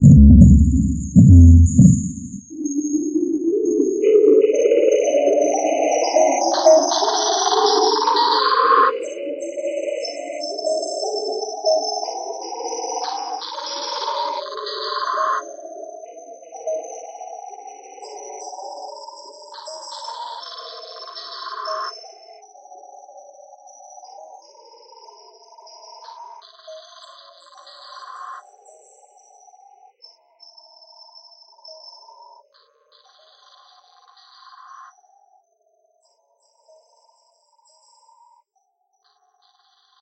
DISTOPIA LOOPZ 001 120 BPM
DISTOPIA LOOPZ PACK 01 is a loop pack. the tempo can be found in the name of the sample (80, 100 or 120) . Each sample was created using the microtonic VST drum synth with added effects: an amp simulator (included with Cubase 5) and Spectral Delay (from Native Instruments). Each loop has a long spectral delay tail and has quite some distortion. The length is an exact amount of measures, so the loops can be split in a simple way, e.g. by dividing them in 2 or 4 equal parts.
bpm, delay, distortion, loop, rhytmic